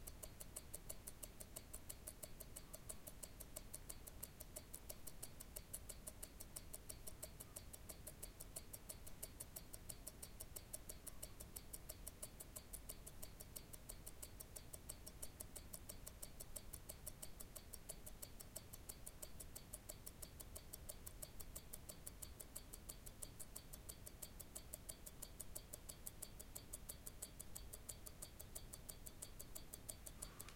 A russian Poljot wrist watch ticking.
clock, tick, ticking, tock, watch, wrist-watch, wristwatch